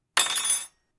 Spoon clattering (2)
A metal spoon being dropped onto my desk. Recorded from about 30cm away. It clatters and vibrates for a bit.
clatter, spoon, silverware, hit, fall